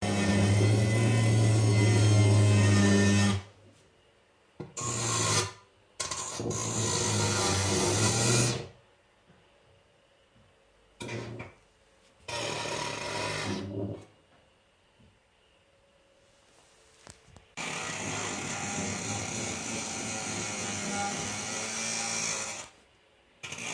Recorded during inhouse construction work with H2N, no editing.
banging, construction, drilling, hammering, power-tools